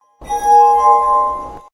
Magic Circle Short Ringing SFX
Great for magic circles in any game!
magic, magical, game-sound, spell, rpg, magician